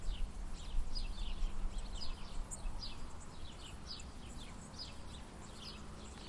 Pag Starigrad crickets birds
the soundscape from Old town called Starigrad near town Pag
birds, crickets